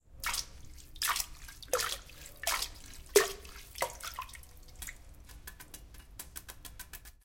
toilet brush immersing in water
Sound of a toilet brush cleaning a bathroom.
Sounds as an object immersing in water several times.
bathroom, brush, campus-upf, cleaning, toilet, UPF-CS13, water